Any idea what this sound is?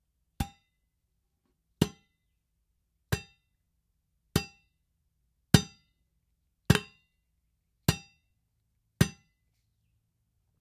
soft metallic hits
soft, metallic